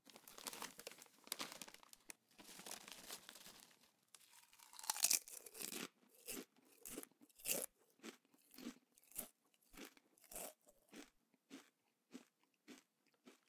Grabbing some potatochips and chewing them.